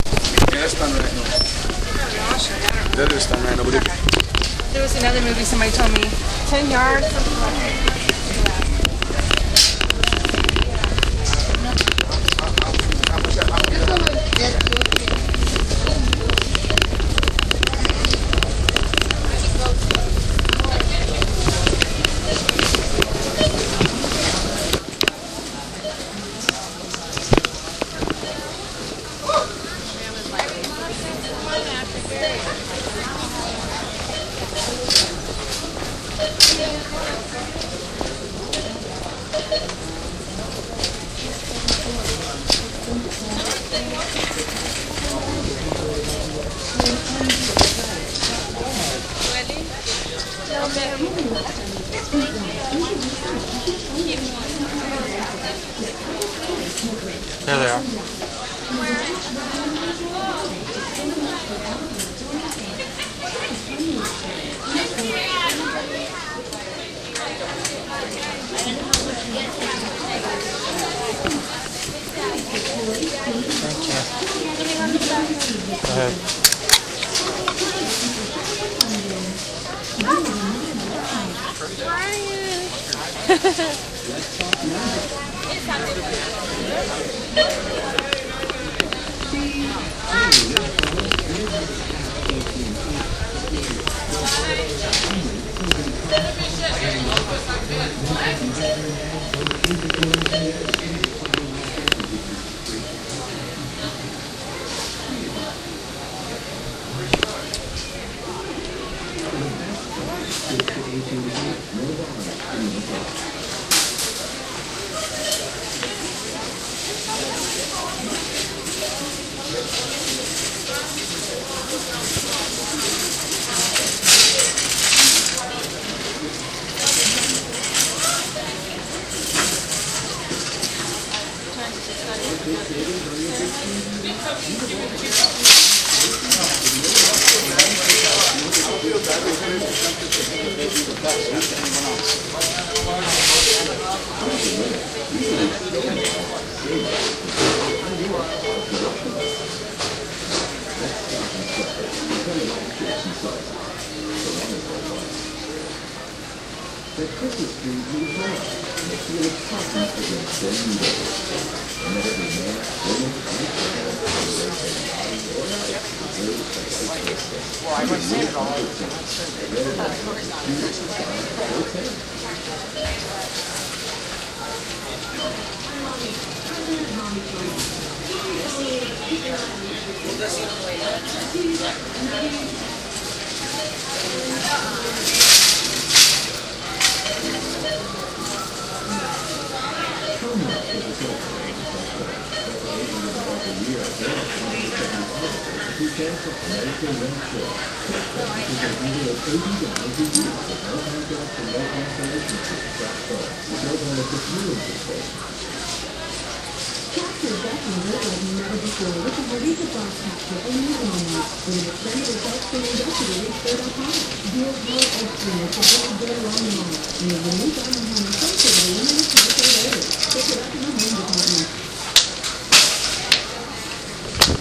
Horrible idea, put DS-40 in cart and let the vibrations distort and create a pseudo earthquake (sans people screaming and shit breaking). I rolled the bass off a bit, roll it back yourself.
christmas, field-recording, rumble, shopping